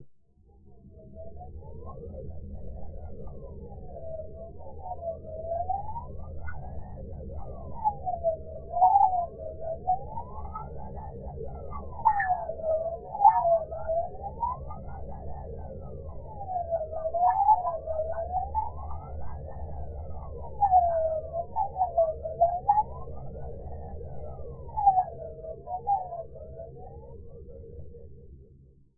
Space Whistle
Heavily processed VST synth sounds using various filters, reverbs and phasers.
fx, effects, VST, effect, Whistle, noise, reverb, Space, filter, sound